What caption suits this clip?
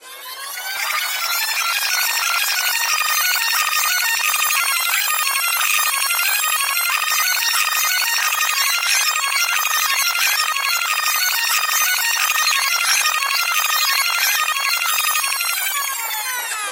Another rewind I made in Audacity